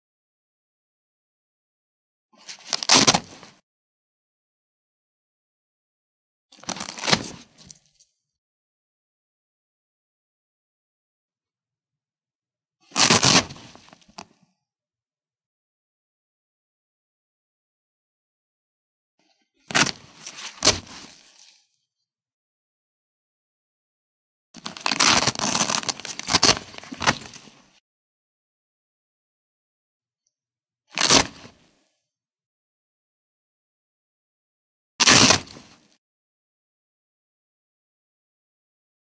Velcro Rips
Ripping open a velcro bag
152, GARCIA, MUS, Pull, Pulled, Rip, Rips, SAC, Velcro